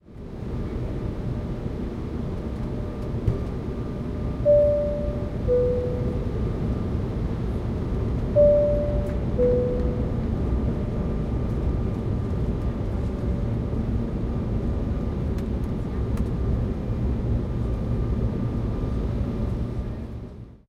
Airplane, Seat Belt Beep, A
Raw audio of an airplane seat sign beeping inside a Boeing aircraft during its flight. The noise is unedited.
An example of how you might credit is by putting this in the description/credits:
The sound was recorded using a "H1 Zoom recorder" on 29th July 2017.
airplane, beep, beeping, belt, plane, seat, seatbelt, sign